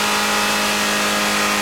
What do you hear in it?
Loopable clip featuring a Mercedes-Benz 190E-16V at approximately 6500RPM at full engine load. Mic'd with a Rode NT1a at 30 feet in front of the car.
vroom, vehicle, dyno, mercedes, benz, engine, dynamometer, car